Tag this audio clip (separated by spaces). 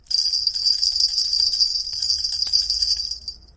bell ring ringing small